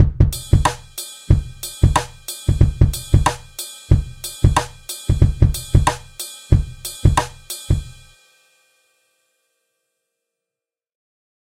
DJFBeat Hip Hop
Just a simple Hip Hop beat.
rap, smooth, hip-hop, drums